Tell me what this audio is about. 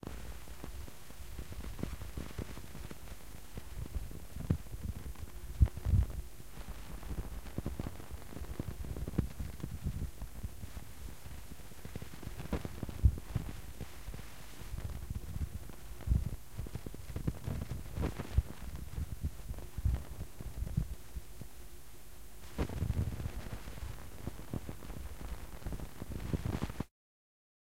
hg tape noise
granular-synthesis, Hourglass, tape, lo-fi, glitch, noise, granular